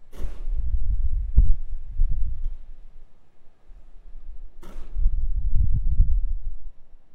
Atmospheric building outside
Building Rode Outdoors